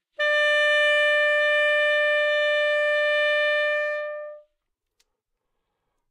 Sax Alto - D5
Part of the Good-sounds dataset of monophonic instrumental sounds.
instrument::sax_alto
note::D
octave::5
midi note::62
good-sounds-id::4666